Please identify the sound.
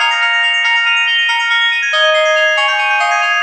sparkle loop
2 bar loop, 4/4 time, 140 bpm, b-flat minor. high-pitched sparkly synth bell sound.